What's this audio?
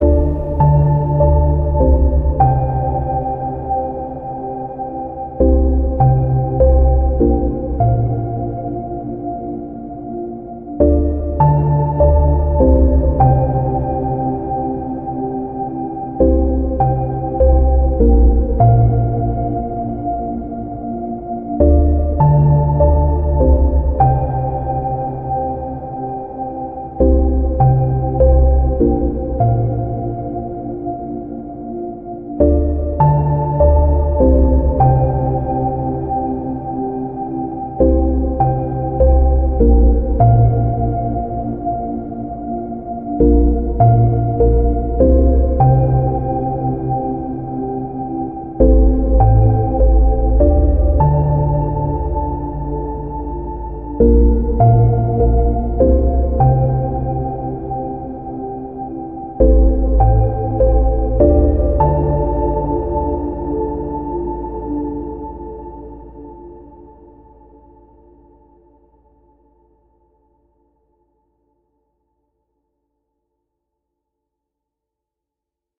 Thank you for listening.
My recording studio is basically a computer with a controller keyboard and a MK3 Micro. All sounds and instruments are created with Virtual Studio Technology (VST) plugins. I do my best to master the recordings I upload, but some of the older recordings lack mastering.
Sound library: Native Instruments KOMPLETE 13 ULTIMATE Collector’s Edition. U-He Diva.
Sequencer: Native Instruments Maschine or Waveform.
Mastering: iZotope plugin.
This results in a high-pitched squeaking sound in the audio.